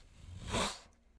Velociraptor Hiss
hiss, dinosaur, velociraptor